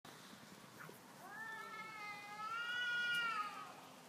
Two cats argueing on the street.
Jimmy and Mr. Ramseier.
Mr. Ramseier pretends to be strong. Jimmy moans.
In the end i clap my hands, to give Jimmy a chance to escape.
And so he did.
Well done, Jimmy!
Recorded with I-Phone 5s, February 15